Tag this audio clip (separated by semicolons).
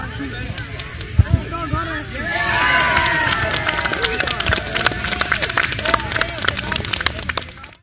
match; goal; soccer; game; younglings